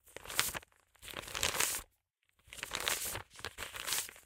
Moolah! Counting Out Bills
Using my Zoom H6 as I count money in a studio atmosphere.
We all love this sound of money in the hands! Big dollars!